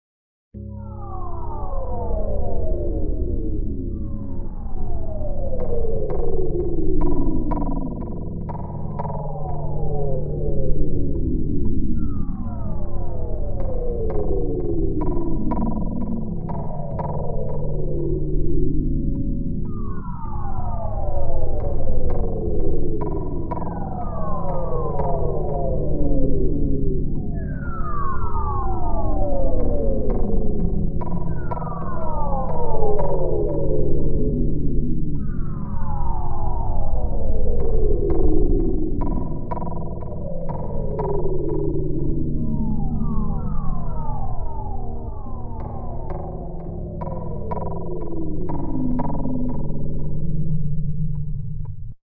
used to illustrate a baby drinking milk in slow motion